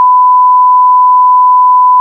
Two seconds of 1kHZ.
Since my other 1khZ recording was so popular here is a clean and continuous version of the tone.
censor, wave, test-pattern, swearing, 1khz, tone, sine, sinewave, beep, koopatroopa63, test